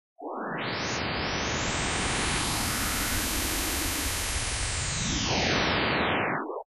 Firefox's Ignition
I just took Mozilla Firefox's old logo and put it into a VirtualANS, of course. I guess it's like a homage to the old logo.